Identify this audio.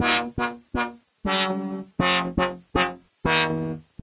A kind of loop or something like, recorded from broken Medeli M30 synth, warped in Ableton.